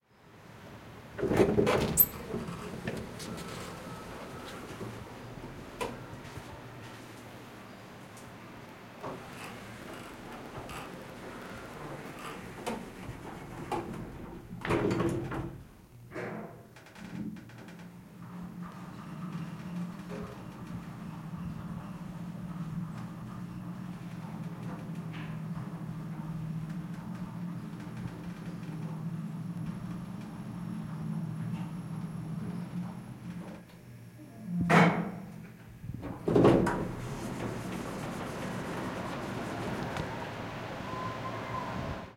The sound of an old elevator.
Recorded with a Sony ICrecorder
Postprocessed to cut low rumble in StudioOne3
Recorded at a hotel in Acapulco,MX
Ricardo Robles
Música & Sound FX
closing, door, elevator, old, opening